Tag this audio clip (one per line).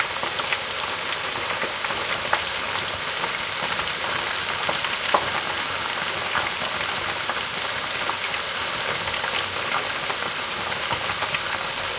cooking
french-fries
fried
kitchen
oil
potatoes